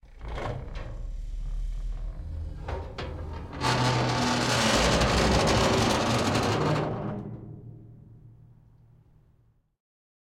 thin metal sliding door close noslam
thin metal sliding door opening soft
door, doors, field-recording, hard-effect, hollow, metal, open, opening, sliding, sqeaking